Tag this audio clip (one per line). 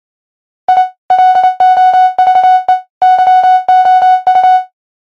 electric
morse
radio
signal